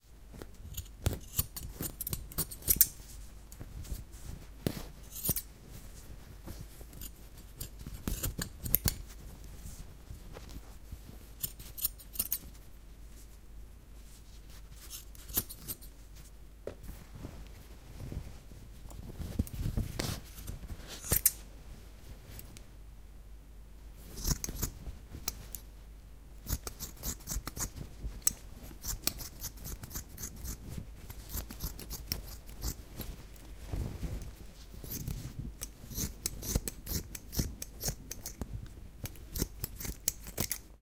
Scissor Cutting T-Shirt Cloth
Totally destroying a tee.
Result of this recording session:
Recorded with Zoom H2. Edited with Audacity.
cloth, clothes, clothing, cut, destroying, destruction, junk, material, recycling, scissor, scissors, slice, synthetic, tear, tearing, trash